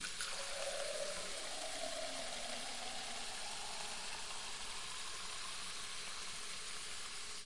water - plastic cup - filling with water 01
Filling a plastic cup with water.